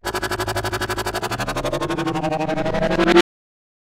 Transformer Transition
This sound is similar to the sound effects from the transformers movies.
Cool, From, HD, Movie, NIce, Robotic, Robots, Sound, Transformer, Transformers, Transitions